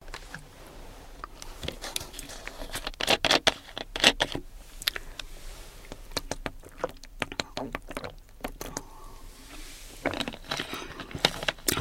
drinking woman
A lady opens the plastic bottle with water, drinks, sips, breathes. Recorded in the speaker booth with Neumann U87ai, during voiceover rec. session.